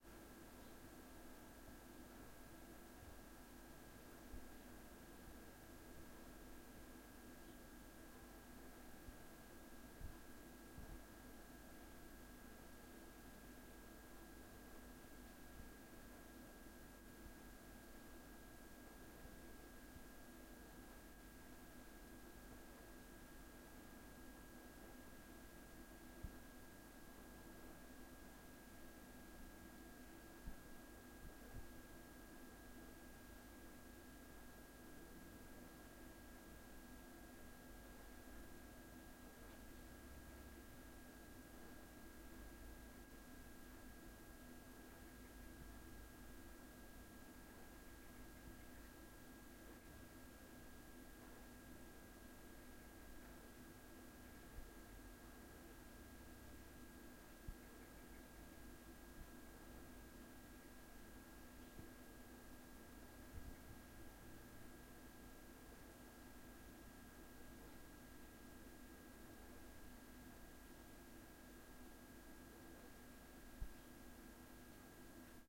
Dining Room Room Tone
Room tone recorded in a dining room. Includes the general background hum of a refrigerator. Suitable room tone for a kitchen/open-plan dining room. Recorded with a Zoom H4N.